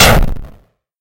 Retro, Pirate Cannon Shot
If you enjoyed the sound, please STAR, COMMENT, SPREAD THE WORD!🗣 It really helps!